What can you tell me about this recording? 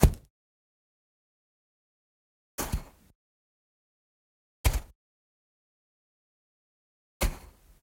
Dropping Plastic Brick in Grit
Recording of me dropping a heavy plastic brick into grit.
Low thud and slight grit displacement.
Recorded with a Zoom H4N Pro field recorder.
Corrective Eq performed.
This could be used for the action the sound suggests. I also used it to represent someone dropping a moderately heavy object on sand.
low-thud, grit, beach, brick, fall, thud, dropping, impact, plastic-brick, heavy, hit, falling